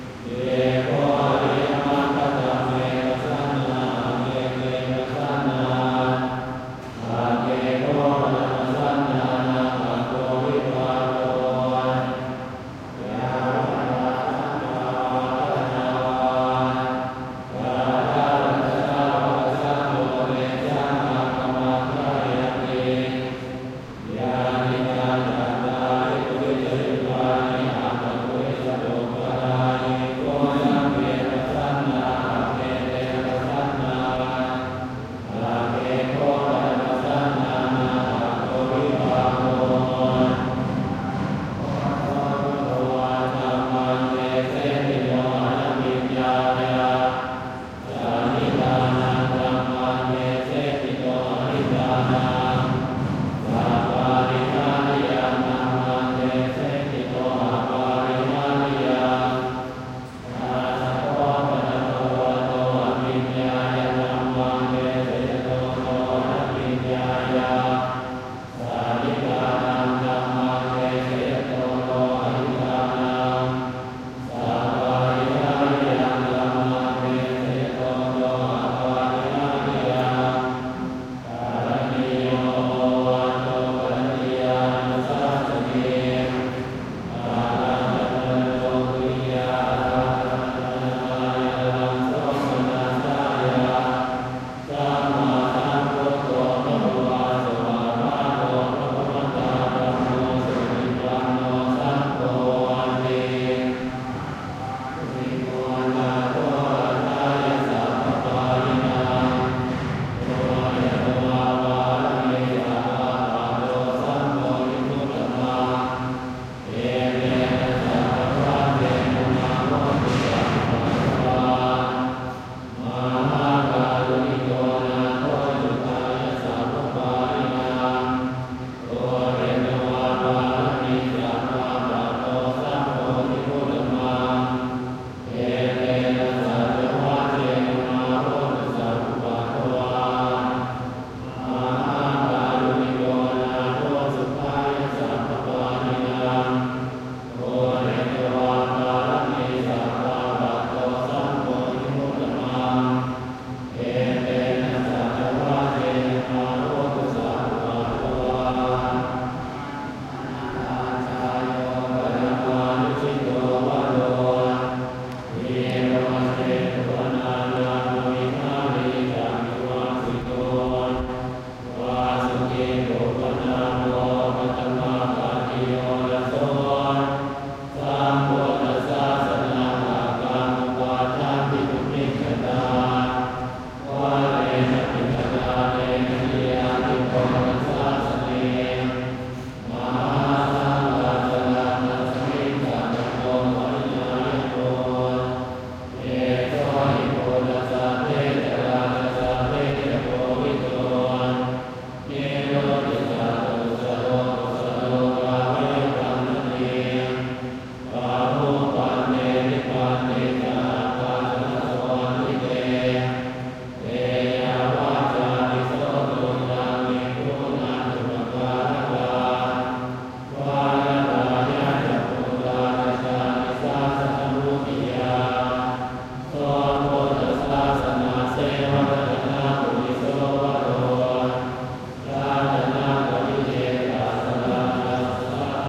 Thailand Bangkok, Grand Palace med group int large temple chanting, praying to Buddhist statue, field-recording
temple, Thailand, int, chanting, Palace, field-recording, Grand, Bangkok